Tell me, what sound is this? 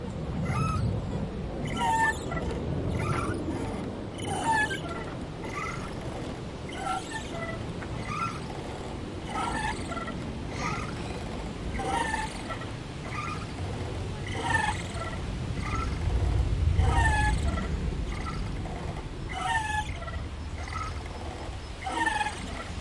some swing squeaking a lot